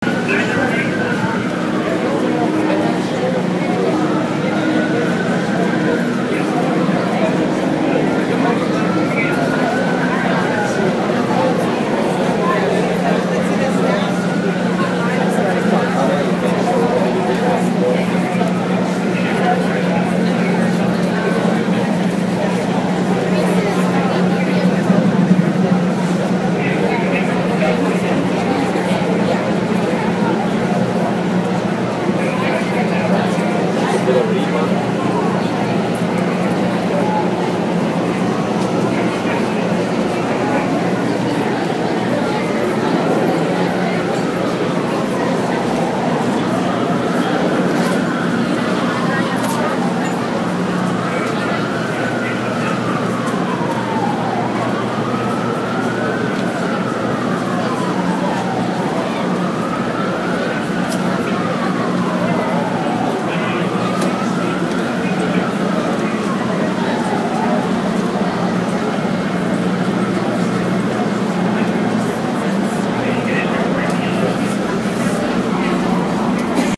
NYC Waiting Line
New York City waiting line at the 9/11 museum, recorded with an iPhone 5S.
Recording date: August 2015